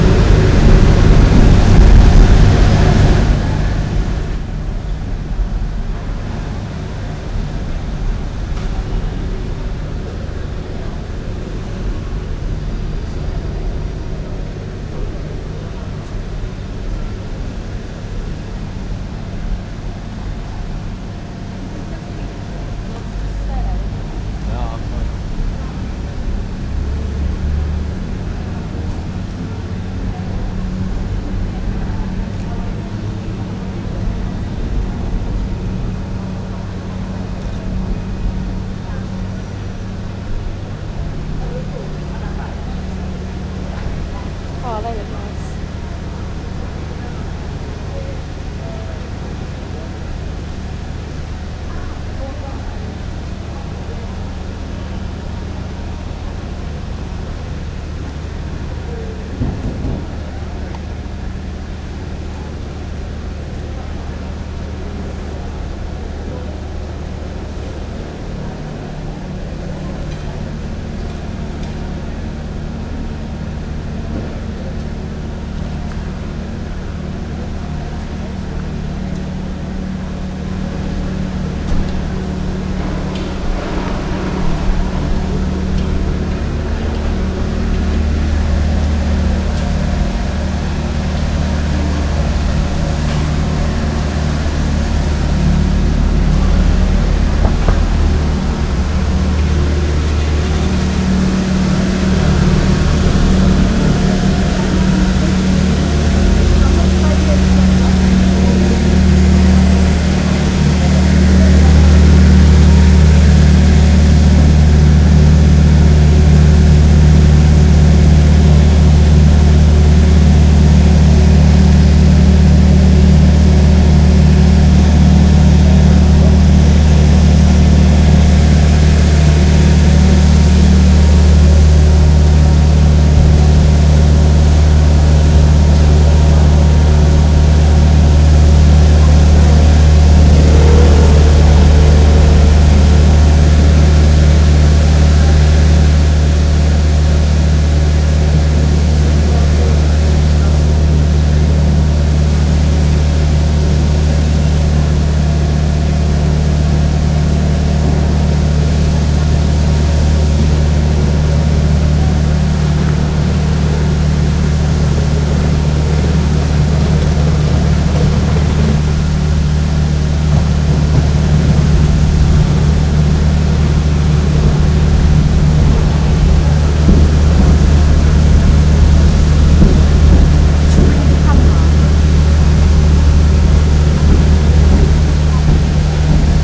Borough Market - City of London Sound 2
Recorded in Borough Market on the road
in the background is the sound of the street cleaner
there are also the sounds of motorbikes, chattering, and people taking pictures.
ambiance, ambience, ambient, atmosphere, background, background-sound, bin, city, cleaner, general-noise, london, soundscape